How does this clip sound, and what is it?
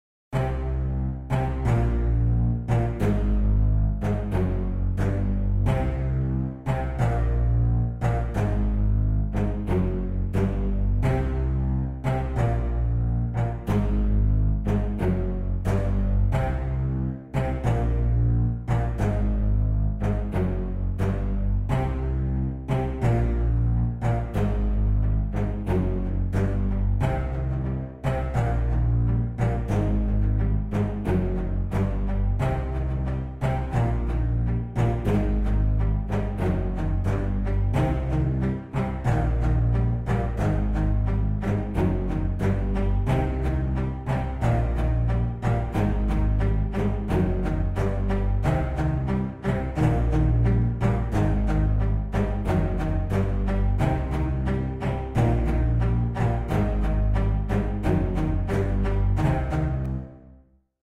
pan-Captain Hook Theme
Some clips created for transition in a play. Originally for Peter Pan but maybe used for other plays.
pan, peter, pirates, scary, scene, shots, silly, synth, transition